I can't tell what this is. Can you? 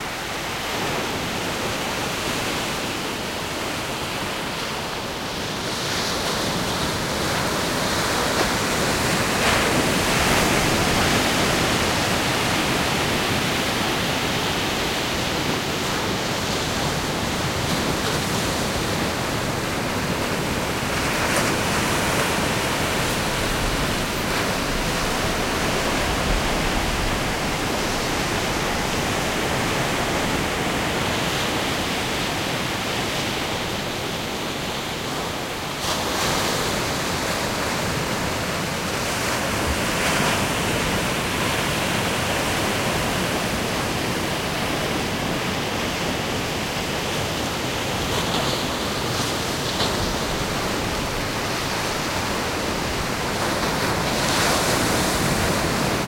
Ocean waves recorded 30 feet from the water edge. Post-processed in Har-Bal to tweak the high and low shelving into a brighter, crisper sound.